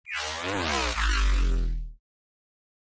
Future pass by effect transition
pass, flyby, fly-by, hi-fi, by, transformer, pass-by